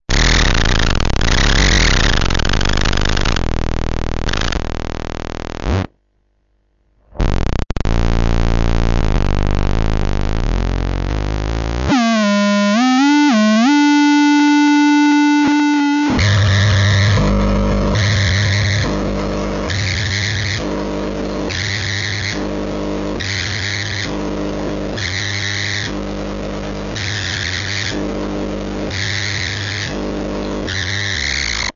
circuit bending fm radio
bending, circuit, fm, radio